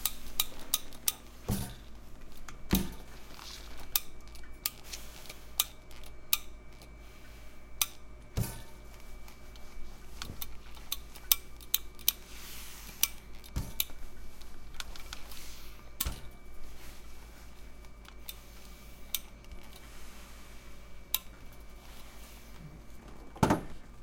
Recorded at Suzana's lovely studio, her machines and miscellaneous sounds from her workspace.